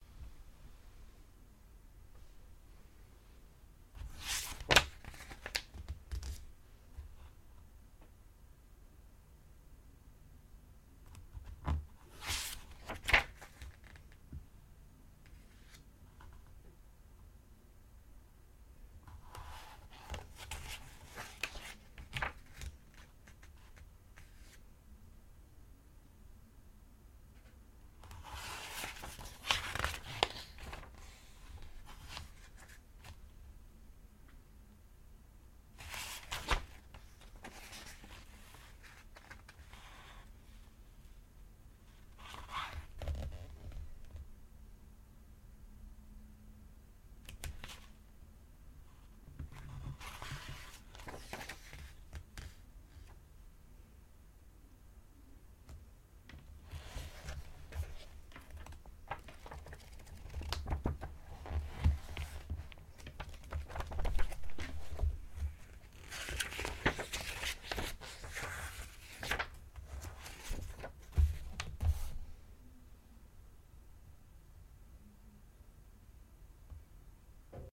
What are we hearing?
Turning Pages and Flipping through Pages
Flipping and turning pages of a book.
Researching, Textbook, Book, Looking, Through, Searching, Reading, Turning, Page, Turns, Flipping, Paper, a, Pages